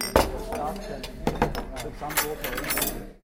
Designa Factory Sounds0002
field-recording factory machines
factory, field-recording